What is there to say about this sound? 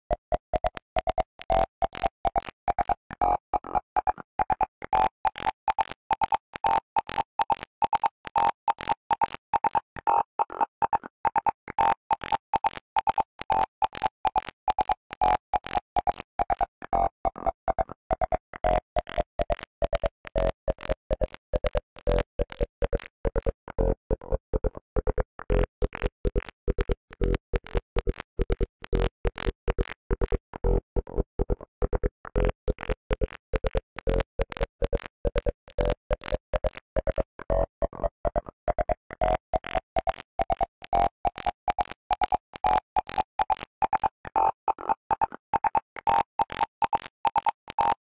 long sine
A synth line inspired by a day in the boiling thermal pools of the Termas del Dayman in Salto Uruguay.
synth, sine, uruguay, acid, del-dayman, lfo